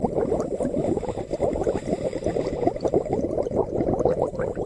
Babbling Brook
The sound of bubbling water generated by water being gargled in my mouth. Extremely realistic.
bubbling, gargling, man-made